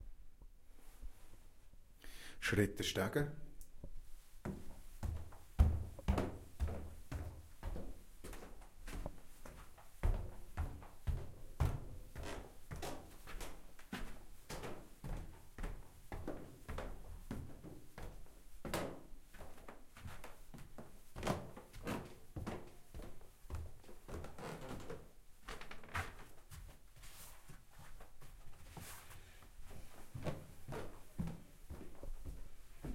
me walking up old wooden stairs